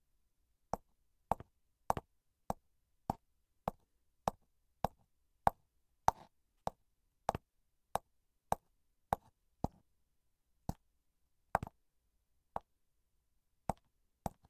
STUDIO recording of a woman walking in high heels.
Recorded with a Yeti USB Microphone from Blue Microphones
high-heels, heels